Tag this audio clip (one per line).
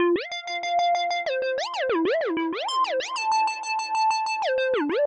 95
bpm
hard
house
techno
trance